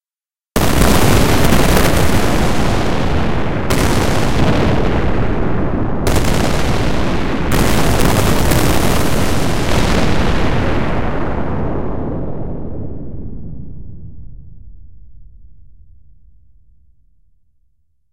spaceship explosion4
space, blast, future, shoot, noise, sci-fi, impact, fx, atmosphere, impulsion, battle, laser, rumble, warfare, energy, weapon, firing, futuristic, explosion, soldier, military, shooter, sound-design, gun, torpedo, fighting, spaceship, shooting, fire, war